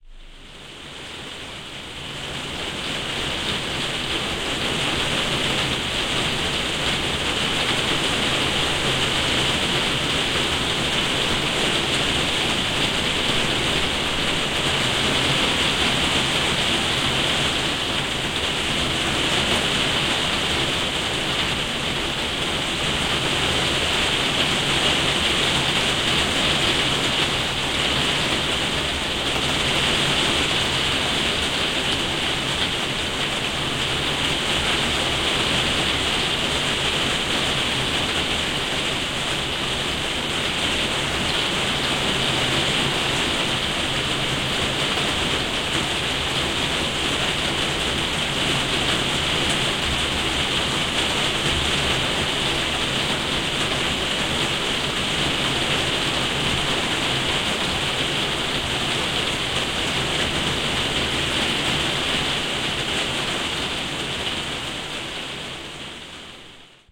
rain on conservatory3
recorded in my conservatory which has a plastic roof which tends to amplify the sound of the rain.
Recorded on an itouch with a blue mikey stereo mic using FiRe app.
conservatory, rain, moderate